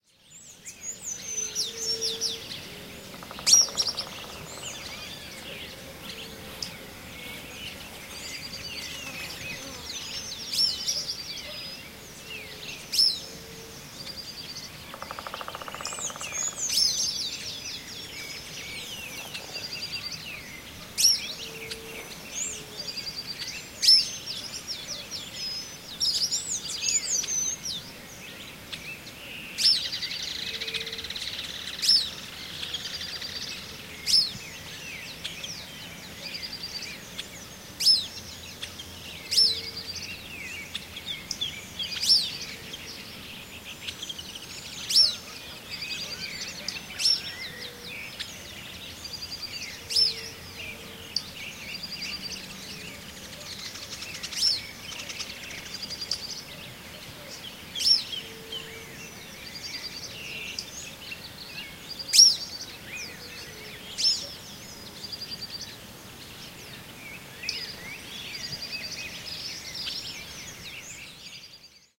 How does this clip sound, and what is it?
Early morning before sunrise on a big farm, so-called fazenda, in the Sao Paulo hinterland, near Campinas, Brazil. Song and calls of birds near the residential area of the farm, by a small pond. Waterfall noise in the background.